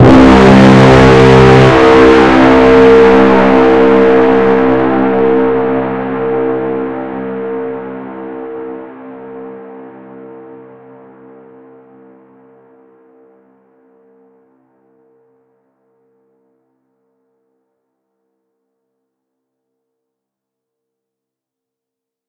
A quick inception/movie trailer horn I made in garageband by layering multiple instruments and adding some distortion.